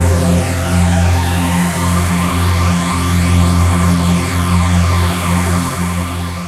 SemiQ leads 2.
This sound belongs to a mini pack sounds could be used for rave or nuerofunk genres
editing, element, sampling, sounds, vsts, wave